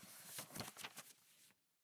Turning the pages of a book
paper, book, turn, flip